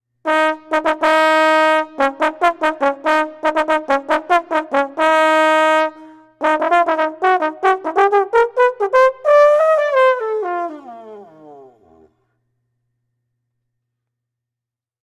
This is just a short fanfare used to announce a king in a short play. After the fanfare the horn player goes into a jazz riff and is stopped. Take 2
The is a recording I made for a fund raiser i am taking part in.
trom, jazz, horn, fare, fanfair, fanfare, royal, king, trombone, trumpet, announcement, fan